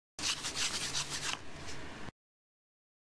Limpiando vidrio
Sonido deun vidrio fregando con papel para FOLEY
papel, espejo, Vidrio, limpiando